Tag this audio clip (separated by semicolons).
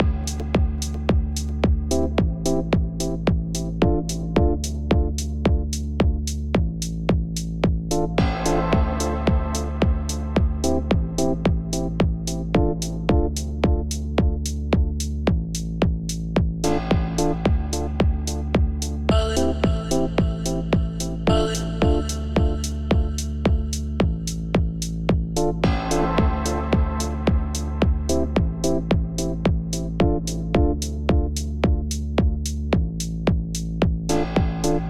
dance; pan; effect; club; 110bpm; kick; house; loop; beat; voice; Techno; electronic; snare; electro; music; synth; trance; original; panning; sound; rave; fx